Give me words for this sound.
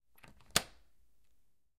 door - lock 01
Locking a door.
door, door-lock, lock, locking-door